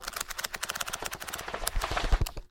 page flip book paper 3
The satisfying and unique sound of flipping pages of a book
flop, magazine, page, pages, paper, reading, switch, turn